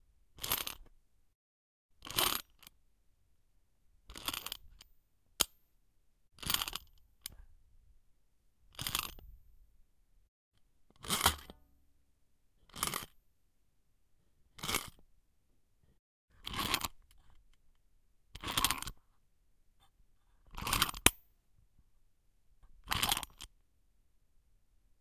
This is the recording of little ladybug wind-up tin toy.
A little tin toy being winded up.
Metal and spring noises.